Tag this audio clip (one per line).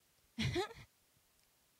risa
risa2
risa4